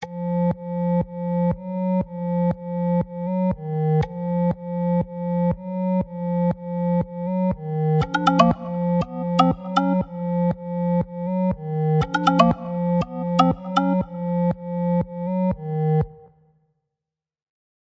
Disco Alien - 12:13:15, 9.57 AM
This is a loop I created using Garageband.